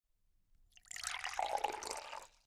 Water pouring into glass
Water pour into a 12oz glass of water.
glass, pouring, water